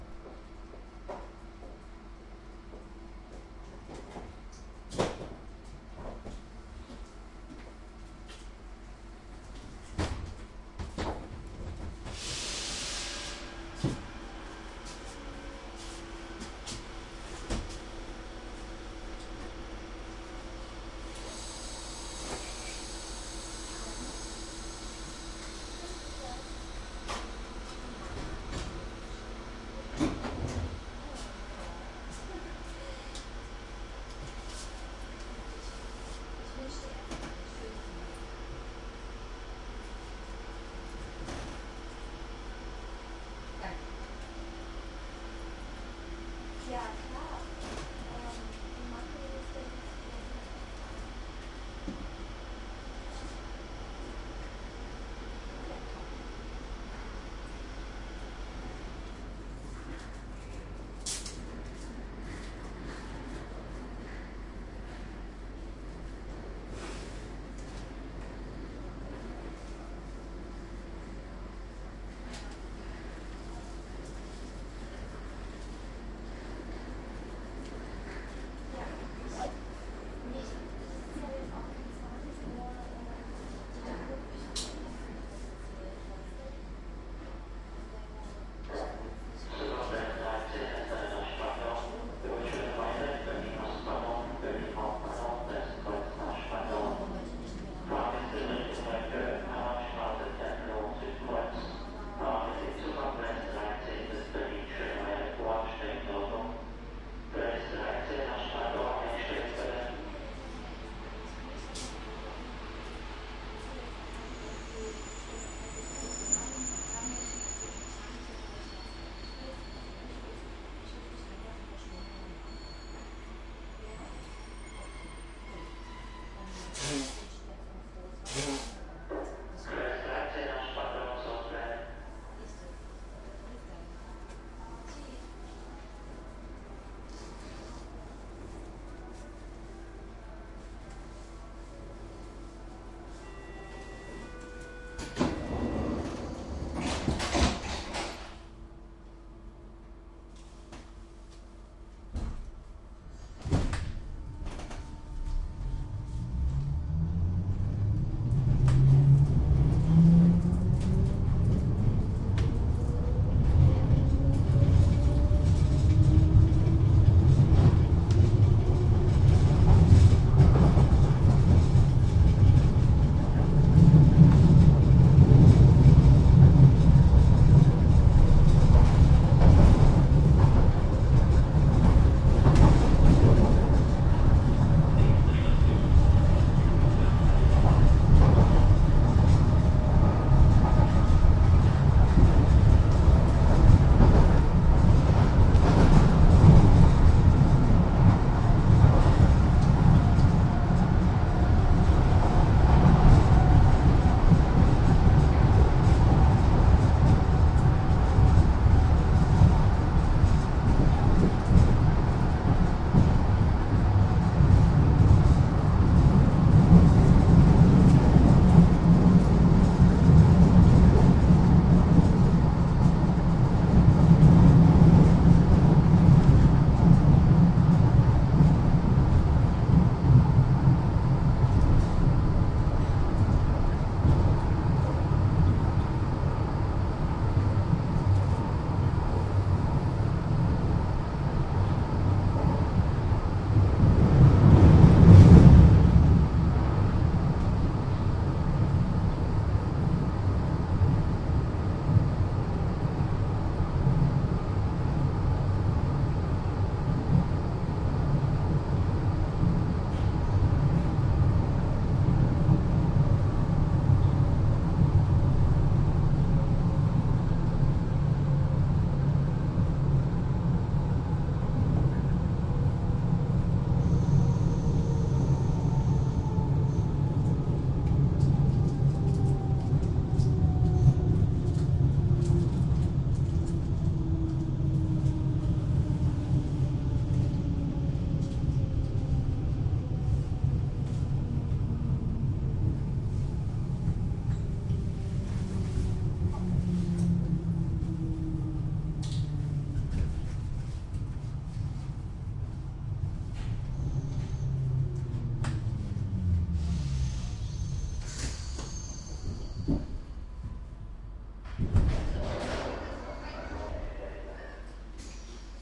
on board a train S-Bahn Berlin
On this track you hear a woman boarding a train at Berlin Schoenefeld station and the ride to the next station. It was a recorded on the "S-Bahn" in Berlin using the rear microphones of a Zoom H2.
berlin, railway, s-bahn, train